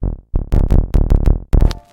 I have tried to (re)produce some 'classic' glitches with all sort of noises (synthetic, mechanic, crashes, statics) they have been discards during previous editings recovered, re-treated and re-arranged in some musical (?) way because what someone throws away for others can be a treasure [this sound is part of a pack of 20 different samples]
digital, processed, effect, noise, synth, click, electro, bass, glitch, hi-tech, abstract